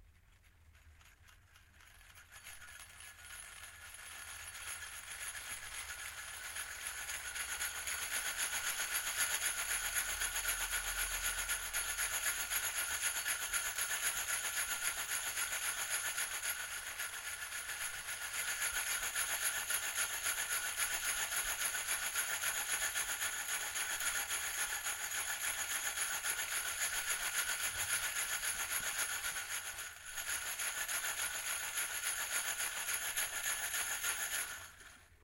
Round bells recorded at different intensity and rythm.
chimes, round